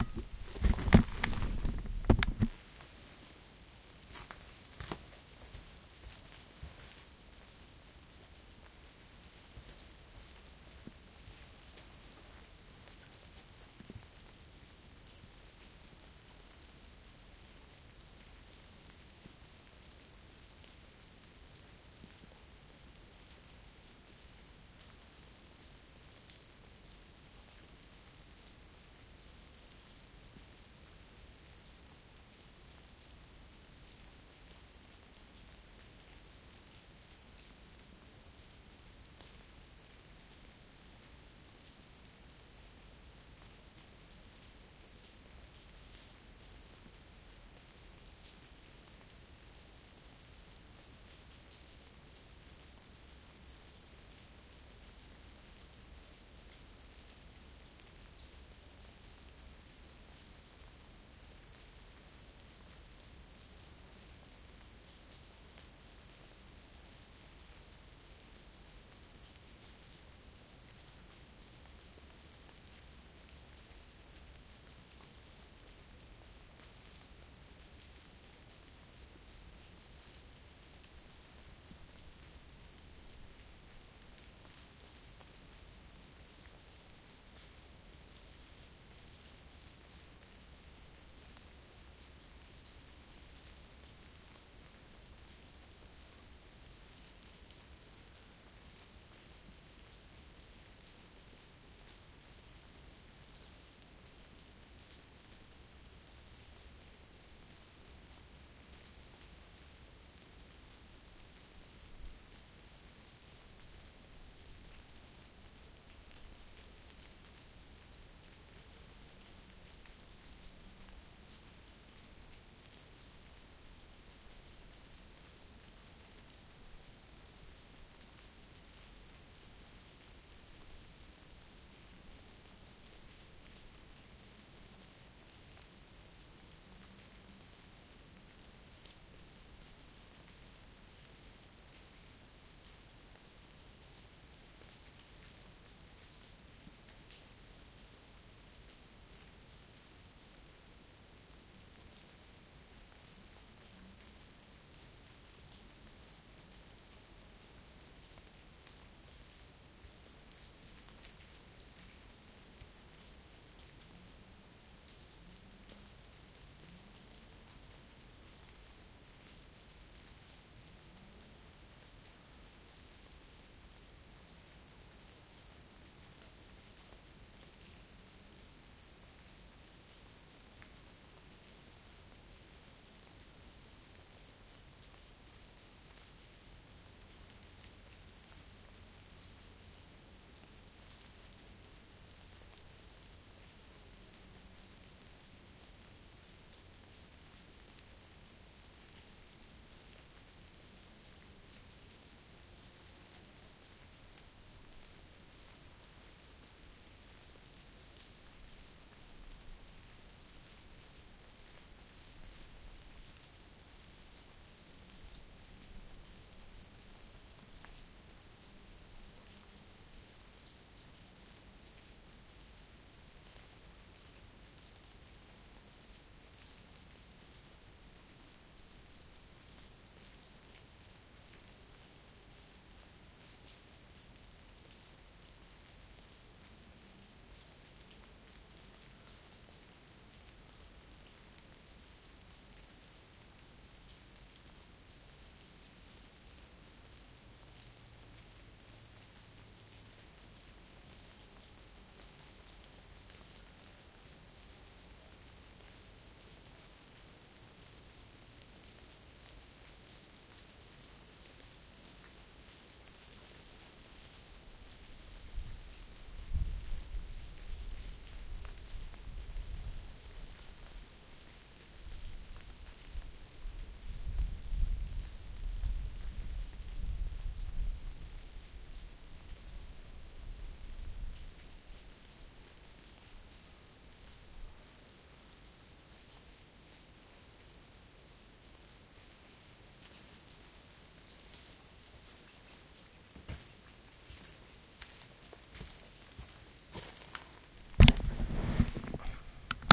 the sound of melting snow on the path high in the mountains - front